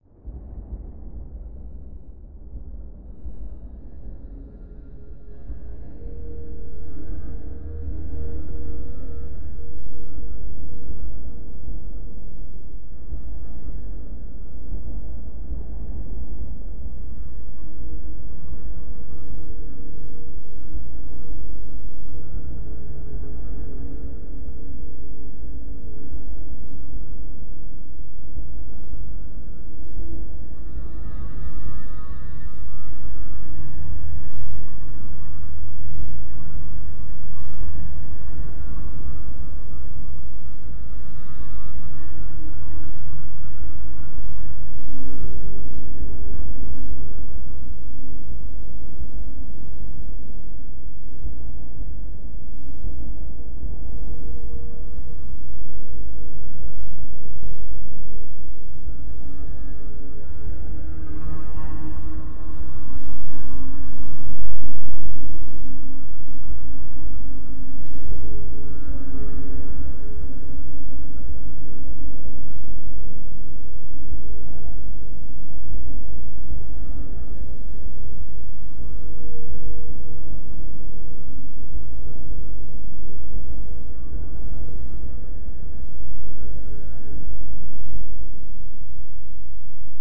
Gods Vocal Dark Fantasy Thunder Thriller Atmo
Spooky, Fantasy, Strange, Ghost, Ambient, Scary, Amb, Thriller, Environment, Movie, Creepy, Film, Atmo, Ambience, Vocal, Thunder, Cinematic